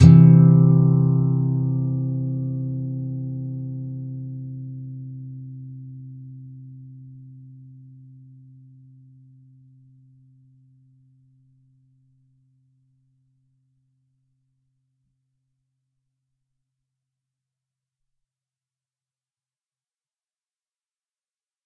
C Major. E (6th) string 8th fret, A (5th) string 7th fret. If any of these samples have any errors or faults, please tell me.